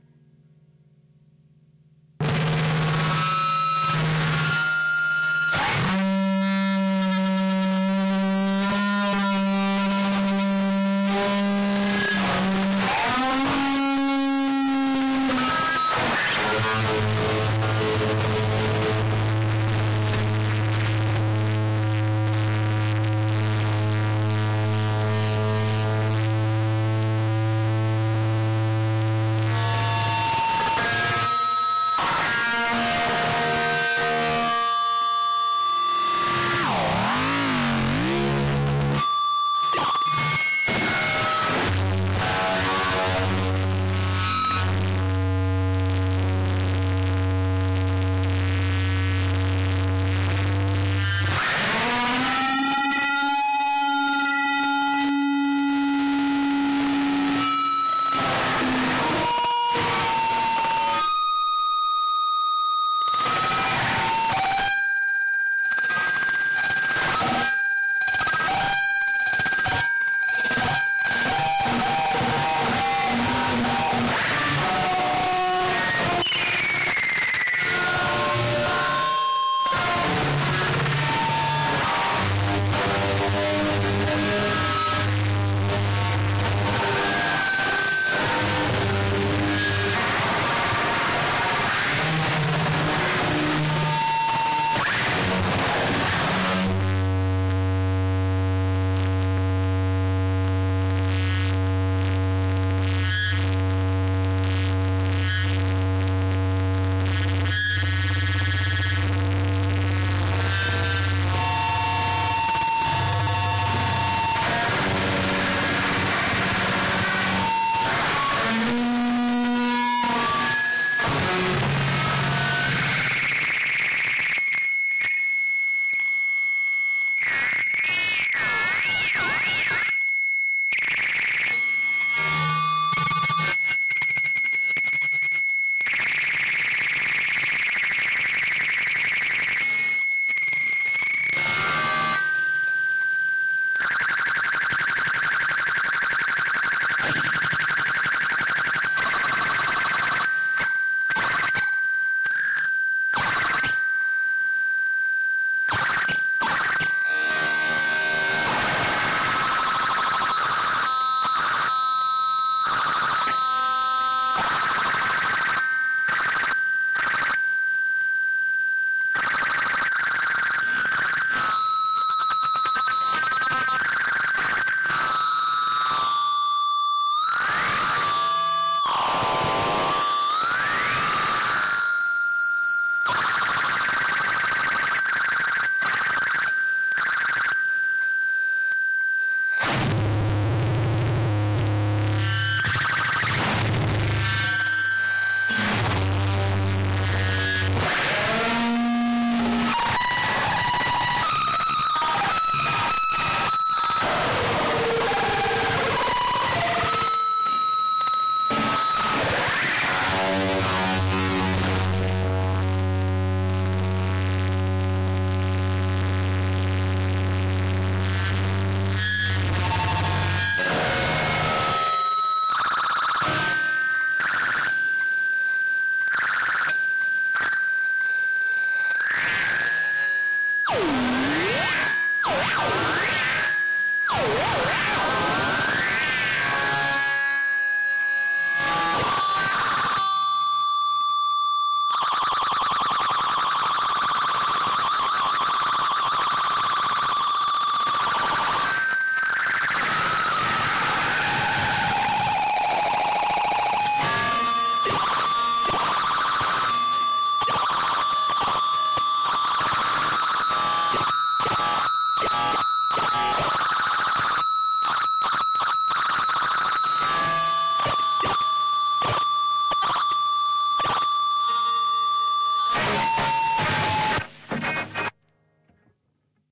this is some feedback that was recorded in the studio after we had finished recording some songs, it was just something to do, the effect pedal used in this is a 'electro-harmonix polychorus'. some interesting sounds in there